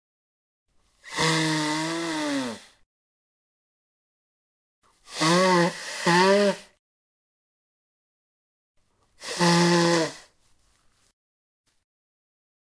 09 - Nose blow paper towel
CZ, Czech, nose, nose-blowing, Pansk, Panska, towel